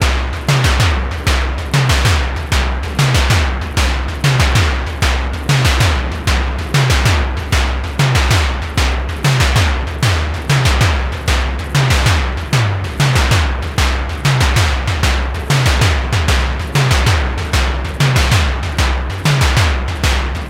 Tribal DFAM 120bpm
Part of assortment of sounds made with my modular synth and effects.